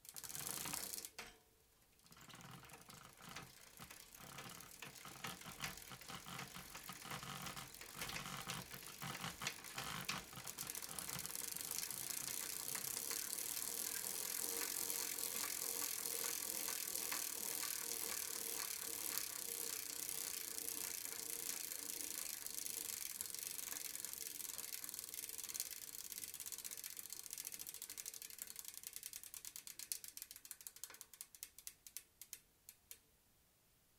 Bike wheel 2
Bike wheel recorded with an AKG 414 through Apogee Duet.